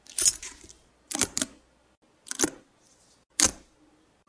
0015 Hardware Flick
Recordings of the Alexander Wang luxury handbag called the Rocco. Hardware flick
Handbag, Leather, Hardware, Alexander-Wang